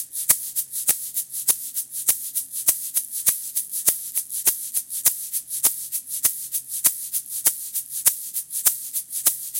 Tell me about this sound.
100bpm loop egg shaker percussion